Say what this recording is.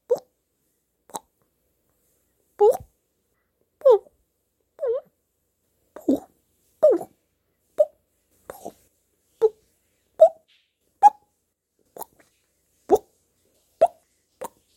pop mouth sounds
pop, mouth, interface, cartoon